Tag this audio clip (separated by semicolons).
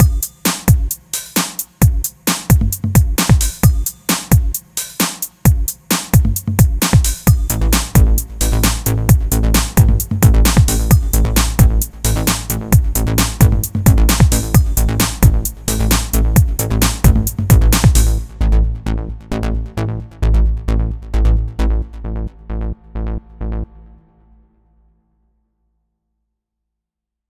132; a; bass; Beat; bigbeat; breakbeat; dnb; drum; loop; nuskool; psybreaks; psytrance; rhythm